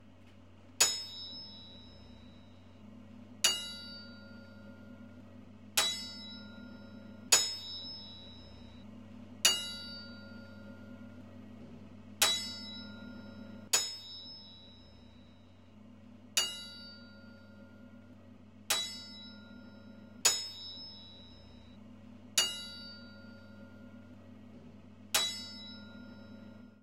Tapping Metal ringing tone
Tapping metal objects. Ringing tones
Factory, Industrial, Metal, Metallic, Metalwork